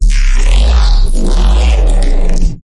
Crunchy Vowel 2
Vocoded bass using my voice and a saw wave. Then resampled multiple times using harmor, followed by reverb techniques.
Crunch, Vocoder, Bass, Fourge